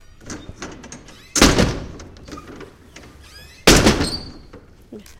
SonicSnap SASP NuriaSofia
Field recordings from Santa Anna school (Barcelona) and its surroundings, made by the students of 5th and 6th grade.
cityrings, spain